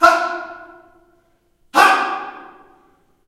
Male Yell Ha
Male yelling in a reverberant hall.
Recorded with:
Zoom H4n
yell, short